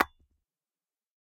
Large glass ornament hit with a ball peen hammer, but ornament did not break. Loud tap/hitting sound at impact. Close miked with Rode NT-5s in X-Y configuration. Trimmed, DC removed, and normalized to -6 dB.
tap, hammer, glass, hit